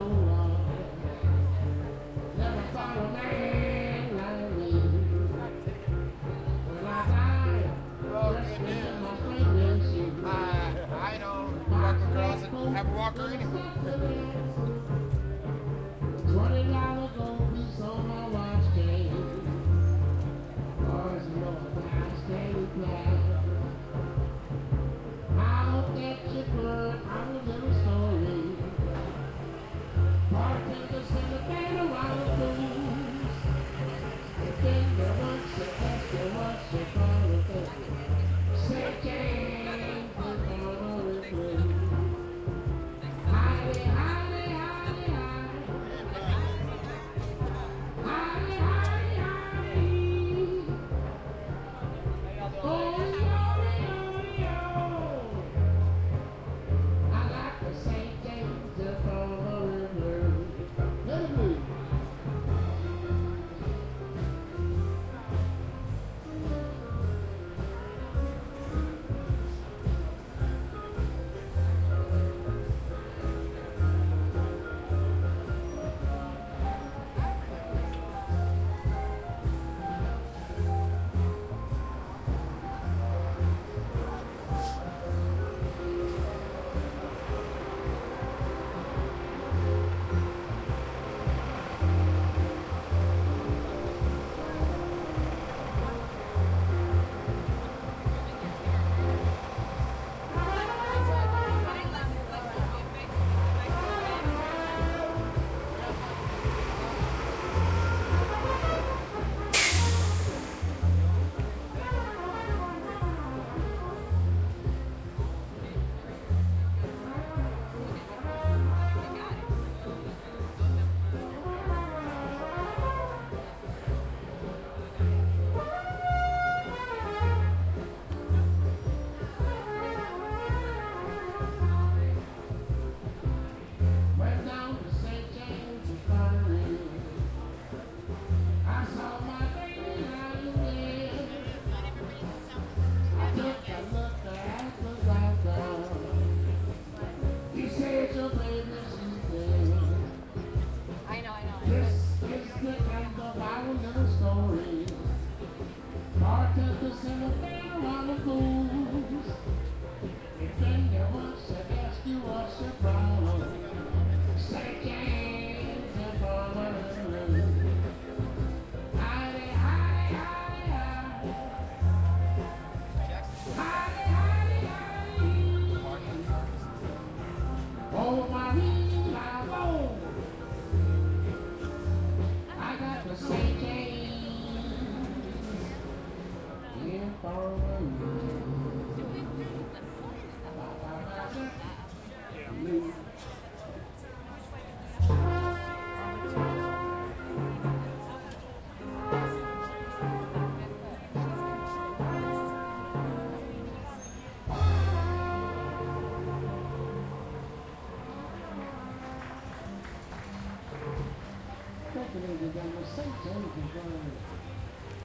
New Orleans Street Life
Sound of a live jazz band and a mix of tourists and colorful locals at the French Market in New Orleans
street, life, jazz, ambience, tourists, new, instruments, new-orleans, orleans, blues